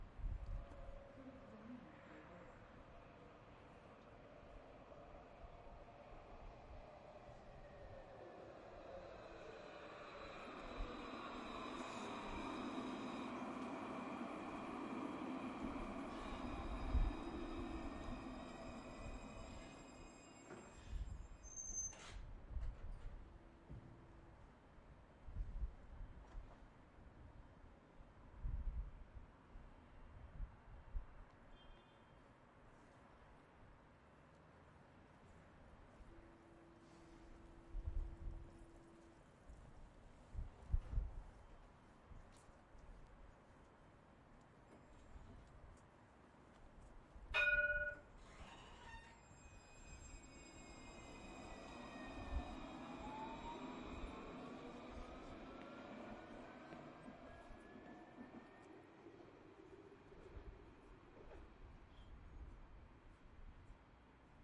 Dublin's Luas Tram Arriving and Departing
A recording of Dublin's tram, The Luas, approaching and arriving at the station (Dundrum)and then departing some time later.
Recorded on Zoom H6 with X/Y capsule.
Arriving, Bell, Departing, Dublin, Dundrum, Europe, Exterior, Ireland, Luas, Metro, Rail, Train, Tram